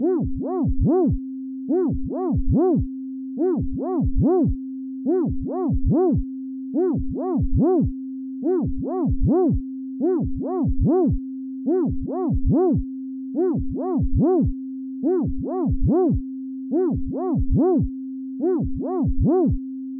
llamada1 ValentinP
Tono de llamada para uso en telefonos móviles. Creado con Audition CC
Voicecall tone for smartphone use. Made with Audition CC.
tono, movil, llamada